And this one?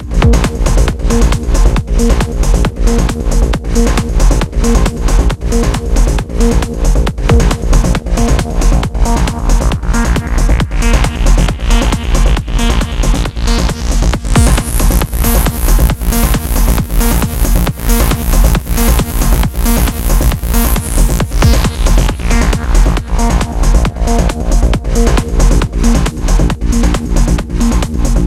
night club wave night loop by kk final
bassline-beat, club, club-beat, dance, techno, wave